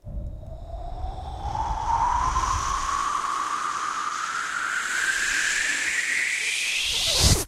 Woosh2 Up 4b 135bpm
Upward woosh
4 bars @ 135bpm
beatbox, creative, loop, dare-19